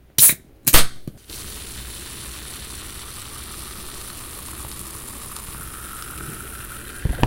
Opening soda can 1
Opening a soda can with the carbonated fizz.
beverage, bottle, can, cap, carbonated, celebration, coke, cola, drink, fizz, fizzy, hiss, liquid, open, opening, pop, sip, soda, sparkling